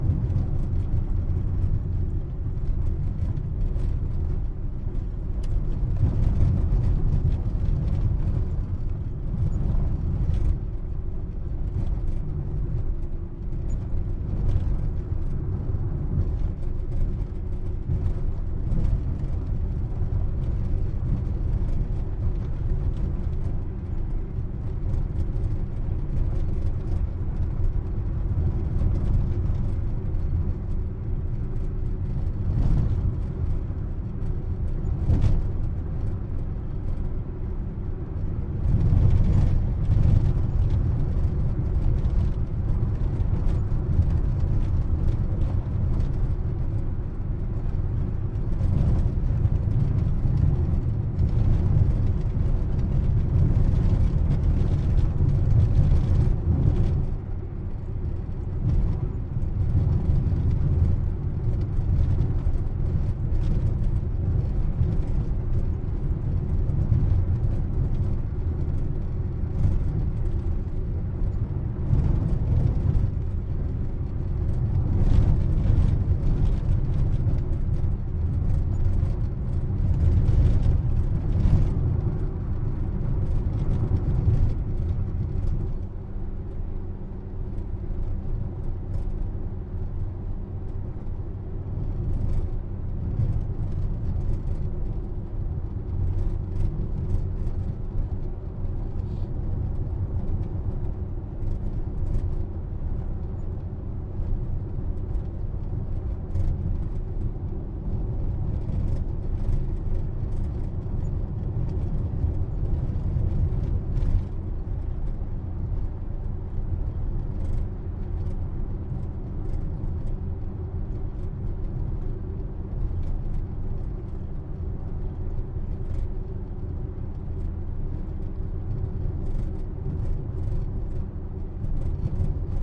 auto int real rattly driving bumpy road medium speed 30kmph rear